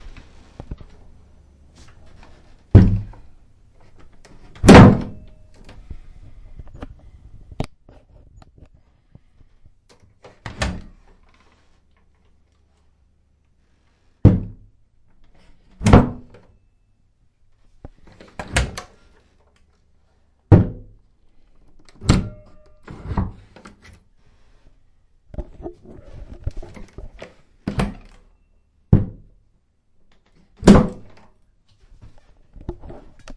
different door closing
the sound of a another door closing
doors, different